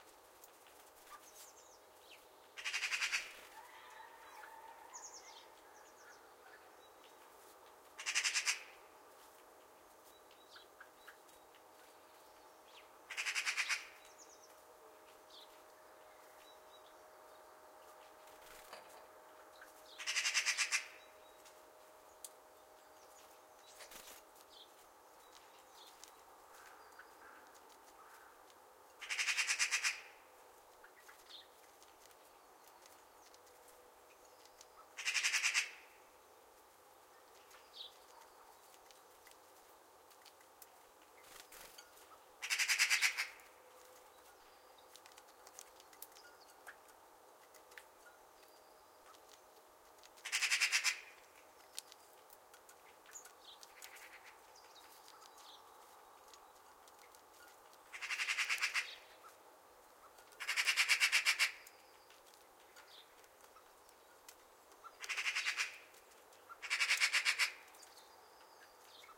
A Magpie around the birdfieding in the winter.
Mikrophones 2 OM1(line-audio)
Wind protect Röde WS8